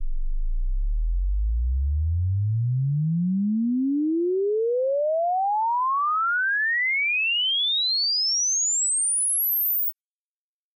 One Sweep from the lower end of the frequency band to the higher. Be Careful, very loud in the upper area!